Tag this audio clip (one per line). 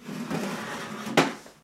chair
furniture
squeaky
tiled
wood